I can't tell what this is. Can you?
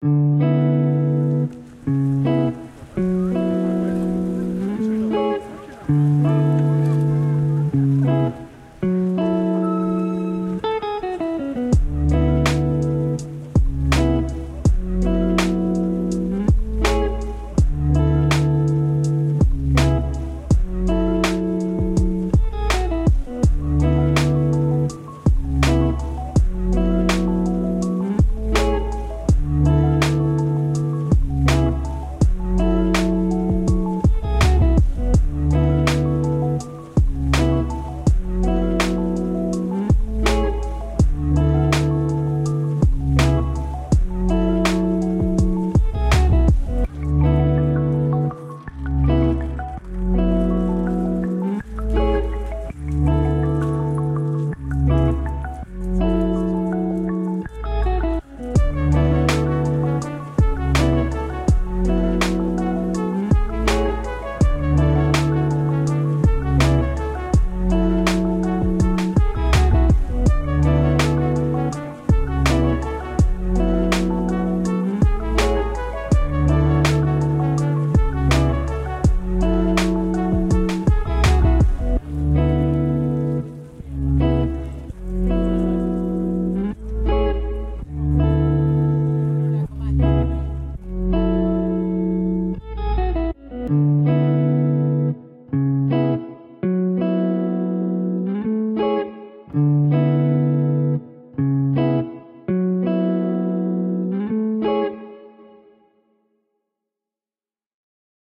Track: 51
Genre: Lo-Fi
If you want to support me it would be a big thanks.
Thanks to: chgrasse
Lol the pluck soo thin.